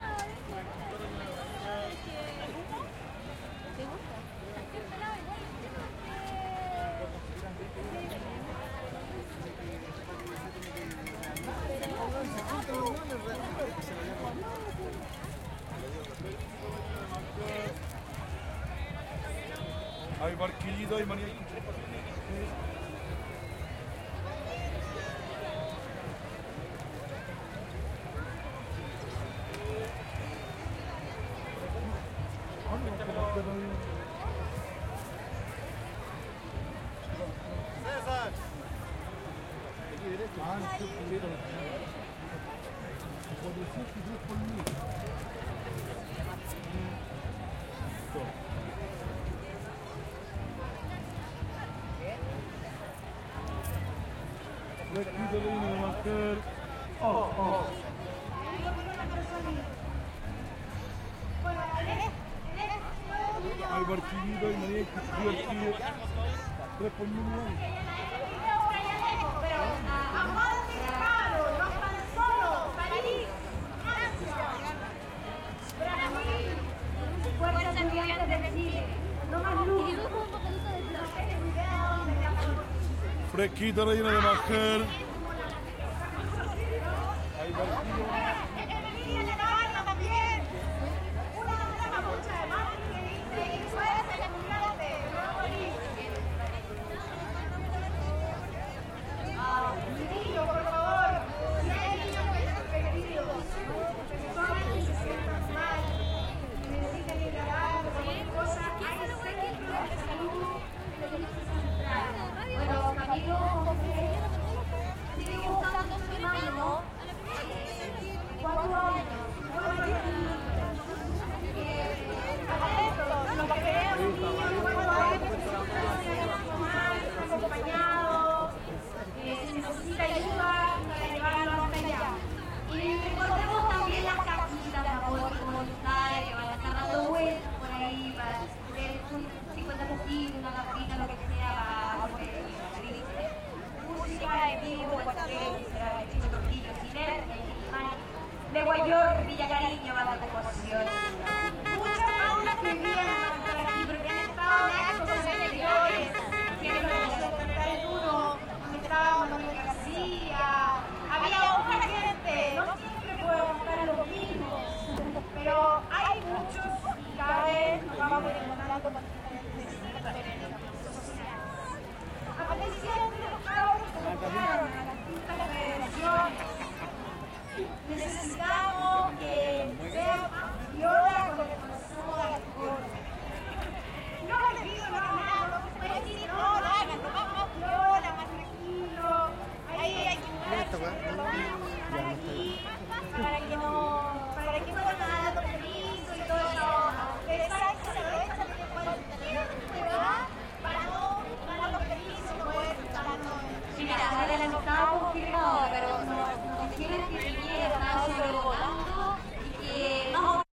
domingo familiar por la educacion 02 - publico lejano y animadora
publico lejano
manies y barquillos cuchufli
animadora hablando
niños perdidos y enfermos
domingo, ohiggins, estudiantil, familiar, parque, publico, santiago, recital, educacion, park, chile, movimiento, crowd